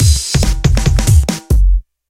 Just a normal drumloop made @ 140 BPM made with FL Studio.Enjoy!